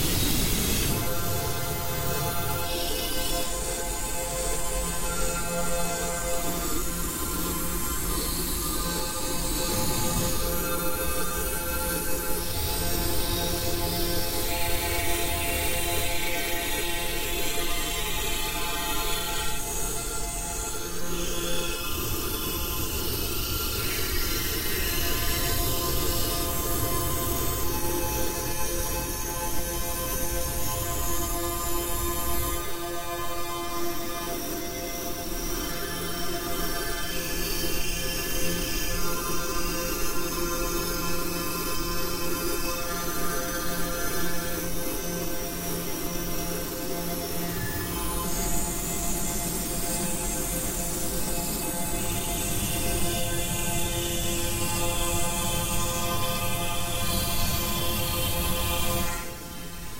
Longer sequences made with image synth using fractals, graphs and other manipulated images. File name usually describes the sound...
image, space, synth